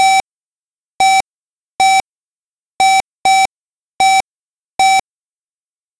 KUMCU Gamze 2019 2020 Checkout
This sound was created using Audacity.
I generated a square tone with a frequency of 770Hz and an amplitude of 0,4 for a duration of 0,2sec to create a scan effect. Then I duplicated this sound 7 times at random times to create the impression of a cashier scanning several products. I used silent noise to fill the blank between the sounds. Finally, I added reverberation to the scanning sound with the following settings :
> size of the room: 40%
> pre-delay: 10ms
> reverberation: 35%
> amortization: 50%
> low tones: 100%
> high tones: 100%
> Wet Gain: -3 dB
> Gain Dry: -1 dB
> stereo width: 100%
Checkout, scan, store